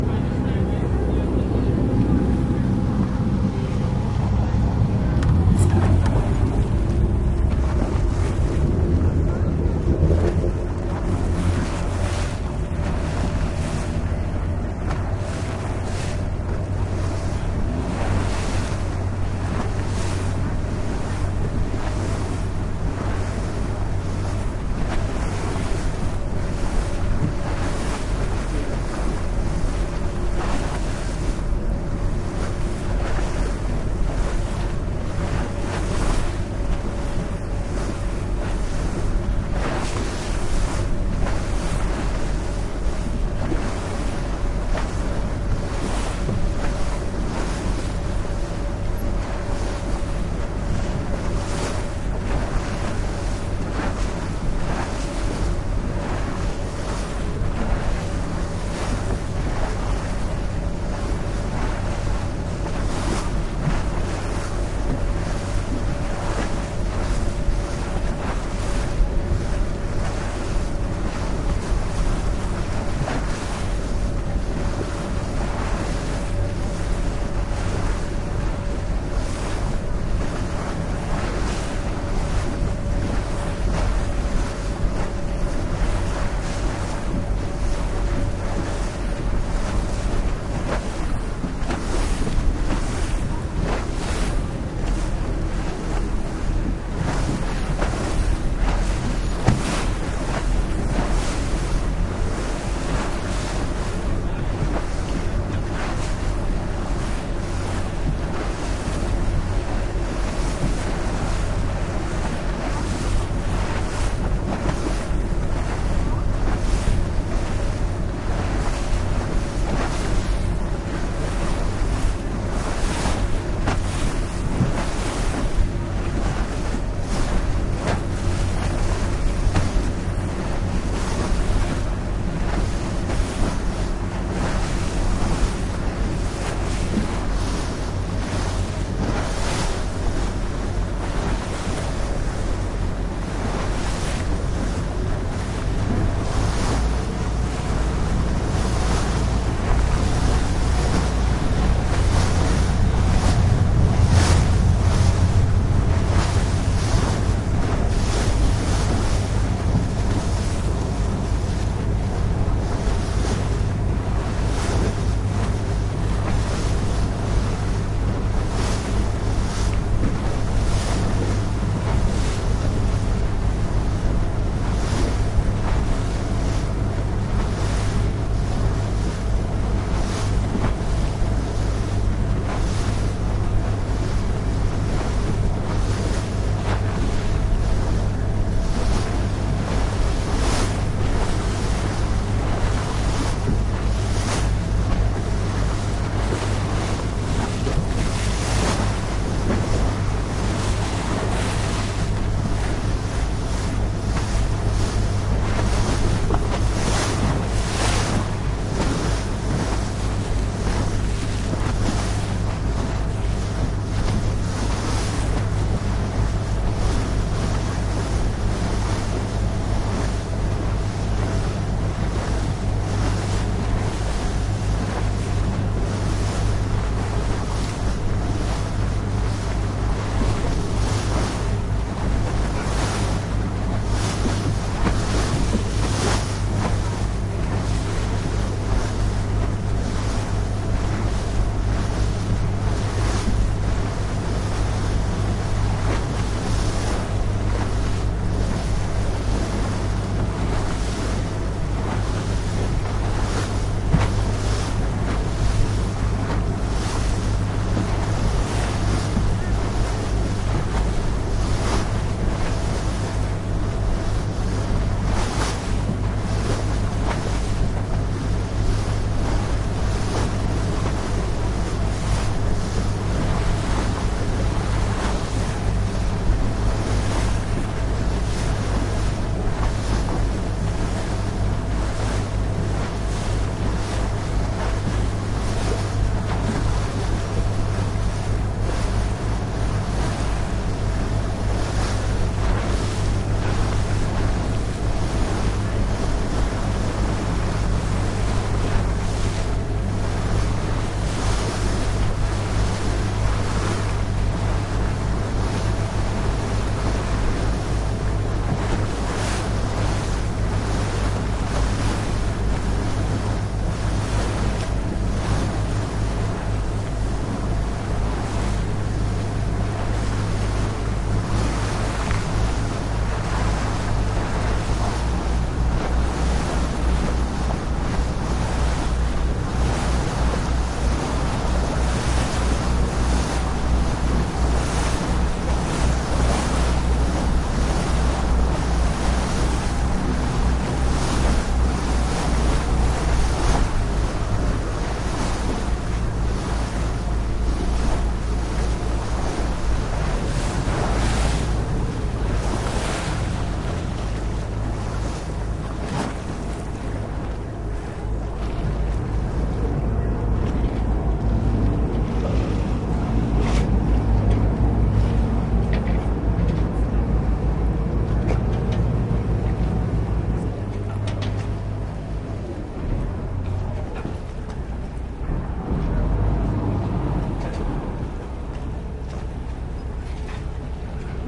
On the shuttle boat from Hilton Venice to St. Marcus